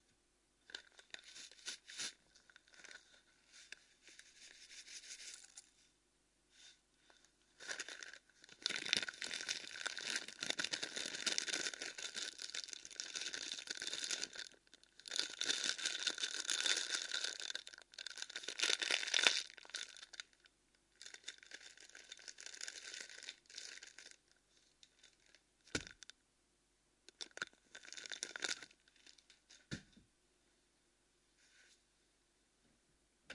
weird-smallplastic
A bad sample crunching some clear plastic